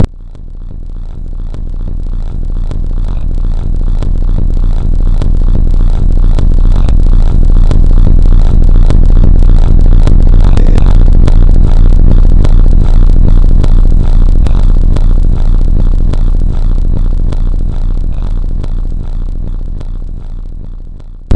Thunder on inside of head.
Creative Audigy Wave Studio 7 Human voices